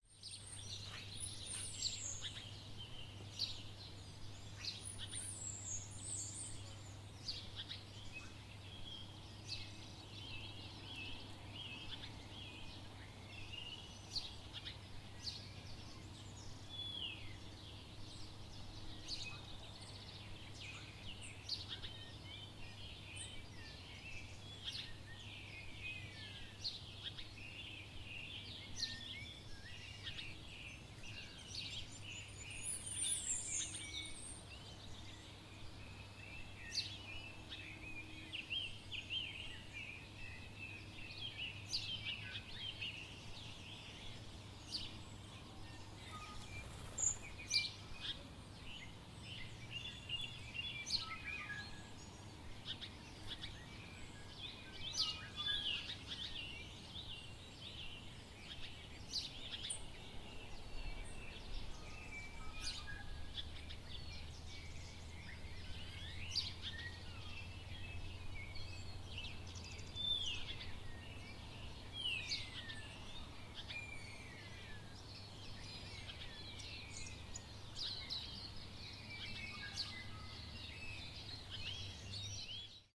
Dawn Chorus
This was recorded in front of my house in the suburbs of Hastings, Hawke's Bay, New Zealand.
It was recorded at 5:51am on 2 October 2016 with a Zoom H4n.
You can hear many birds tweeting.
tweeting, nature, dawn, bird, tweet, chirping, birds